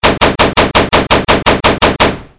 Assualt Rifle Shooting4
I created this sound with a small sample made by "pgi's" which I reused it multiple times right after another and changed the speed to create this amazing sound.